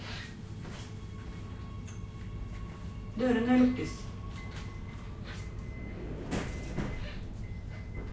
Door close
Oslo metro, voice says: "Dørene Lukkes"
(doors close)